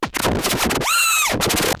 an angry synthesized dog and cat going at it.
TwEak the Mods